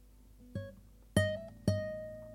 Acoustic Guitar (10)
Few sounds and riffs recorded by me on Acoustic guitar
guitar, soft